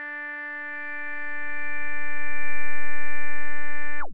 Multisamples created with subsynth using square and triangle waveform.
multisample, square, synth, triangle